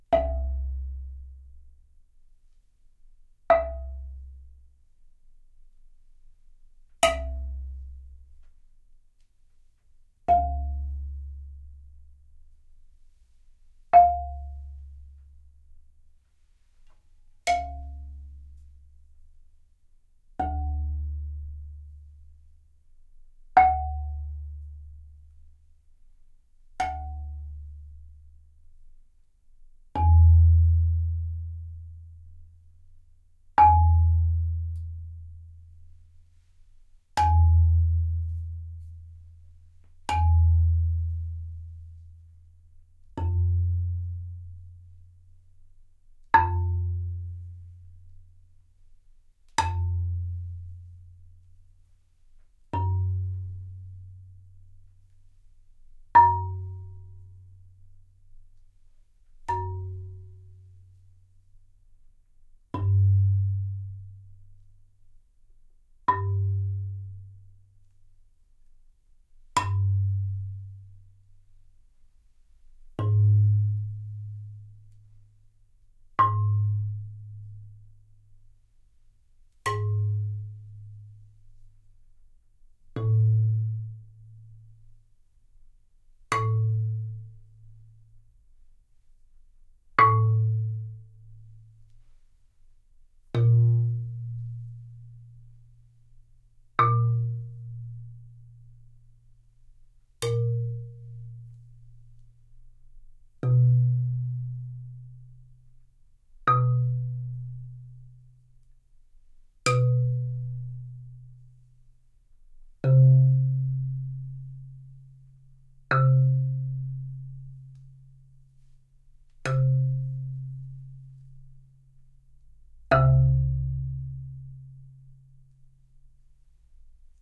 Bass Marima Hits
Bass Marimba hits, from low to high. Soft mallet, fingered and hard mallet hit for each pitch
bass-marimba, marimba-hits, percussion